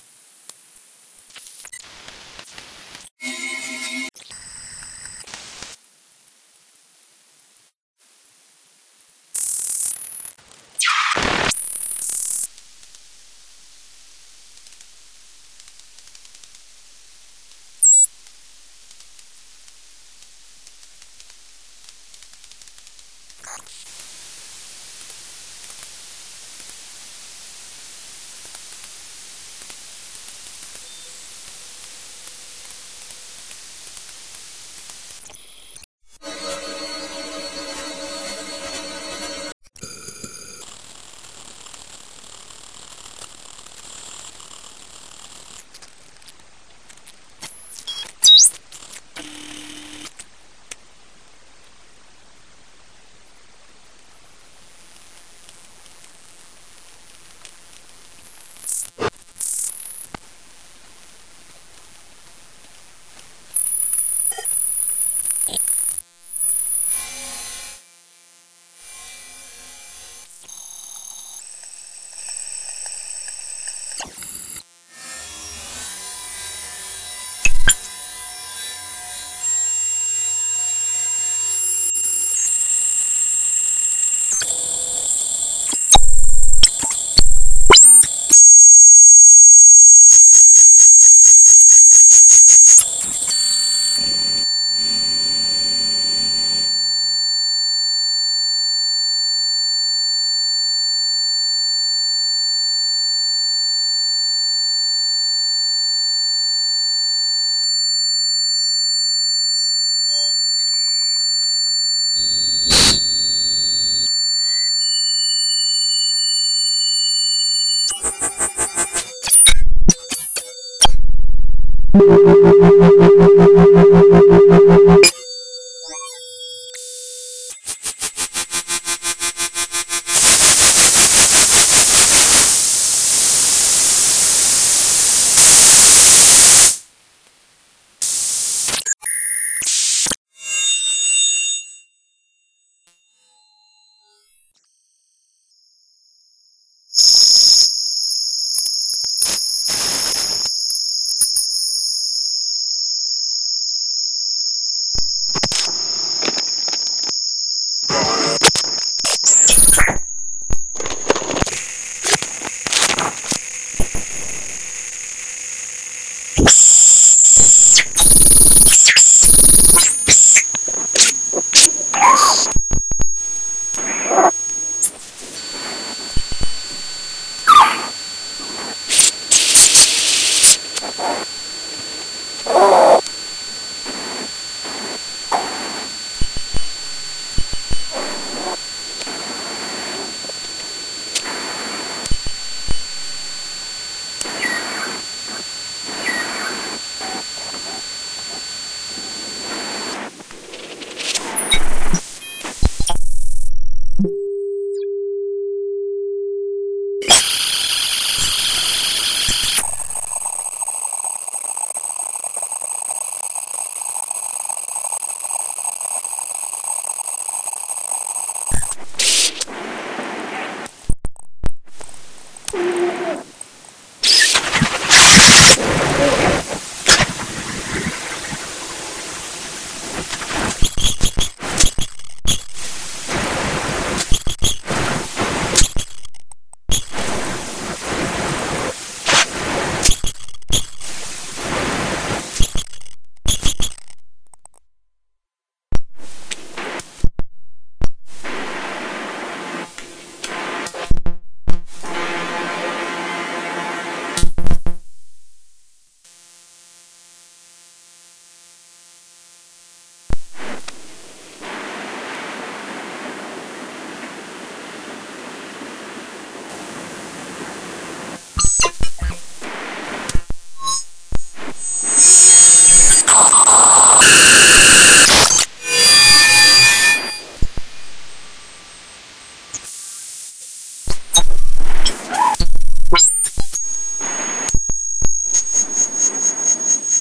Mangled Tape Fodder (excerpt II: "Four, Four, Four, Four")
A short cassette improvisation, recorded Summer 2013 using two Sony hand-held cassette recorders and two circuit-bent Pioneer cassette recorders.
aeu, avant-garde-a-clue, fodder